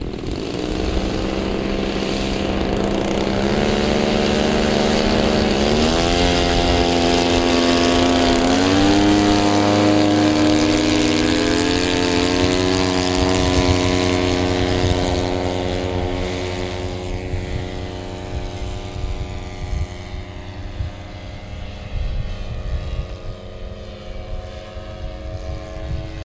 2 radio control planes taking off
a pair of radio control warbirds taking off together
noise, engine, airplane, gas